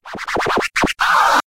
incoming scratch
scratch
**USE MY SAMPLES FREELY BUT
fade, scratch